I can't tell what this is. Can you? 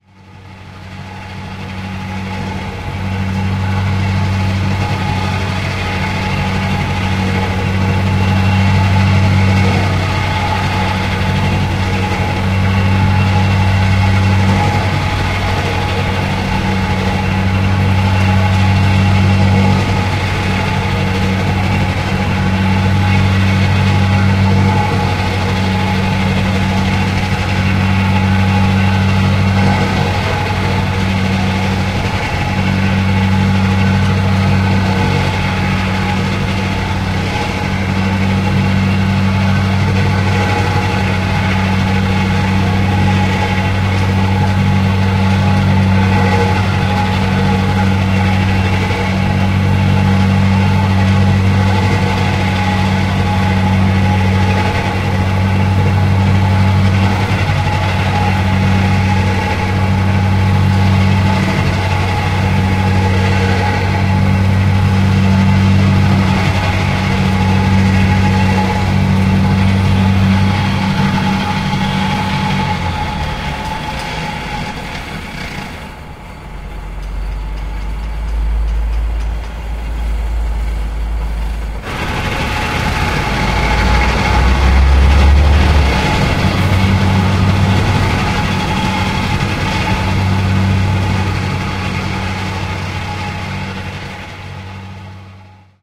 Vibrating compactors
Work on the street
work, street, town, noise, city